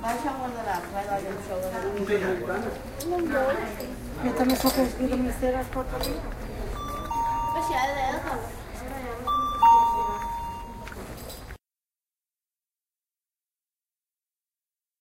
Shop-Porto-19 07 2009
People talking at the entrance of a shop during a walk in Porto. Recorded with an Edirol R-09HR. You can here the sound of the shop bell.